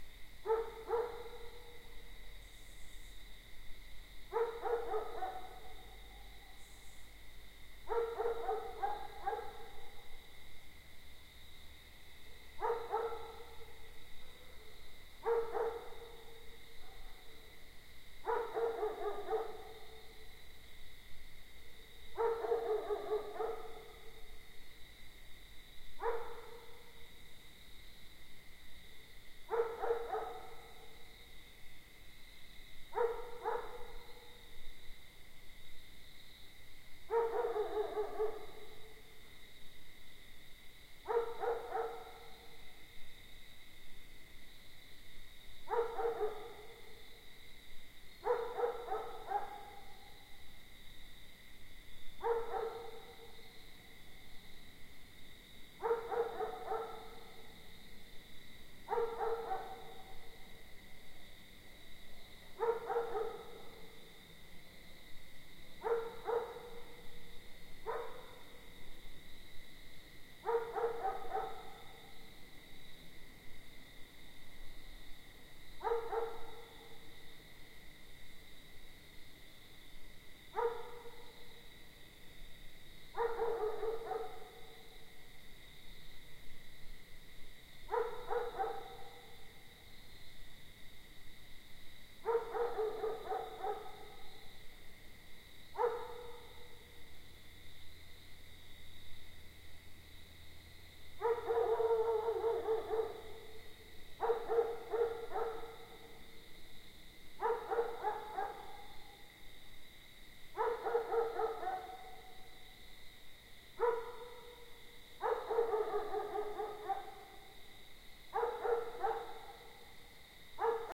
dogbark2min
Last night I took out my handy-dandy little tascam Dr-07 and recorded the irritating bark of the dog next door. There are crickets and, occasionally, another more distant dog that is heard.